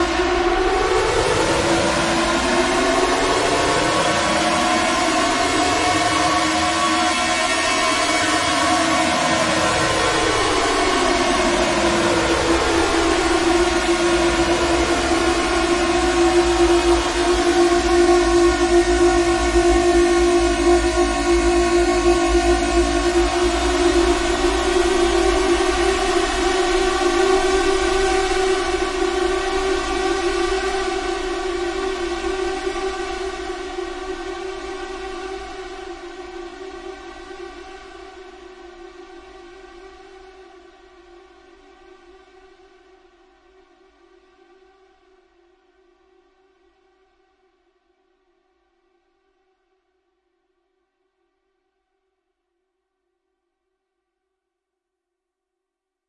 Annoying Buzzer
A long alarm/buzzer sound created with farting elephants and a theremin. Paulstretched in audacity, touched up and cleaned with a wipe.
alarm, annoying, buzzer, horn, theremin